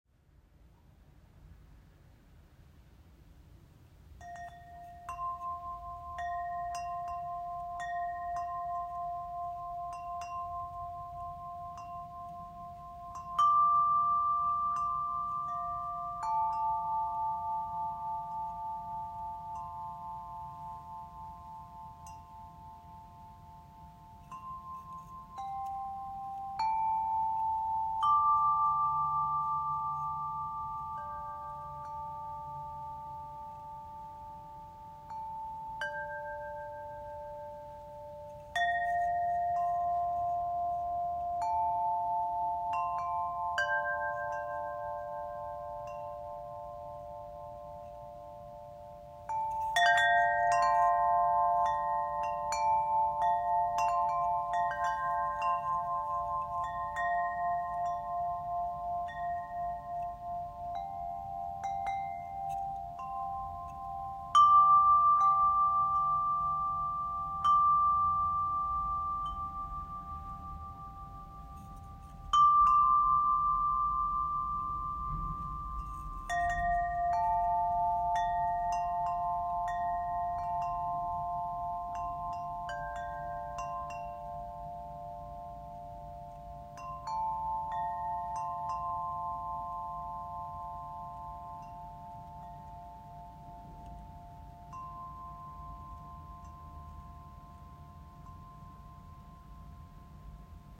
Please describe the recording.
Just some pretty wind chimes.